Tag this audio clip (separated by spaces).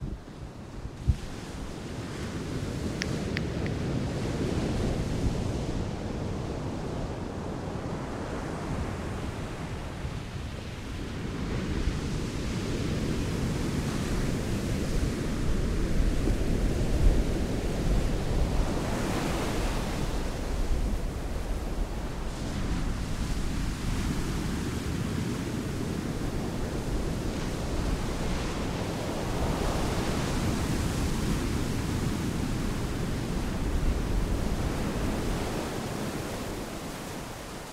beach field-recording ocean water waves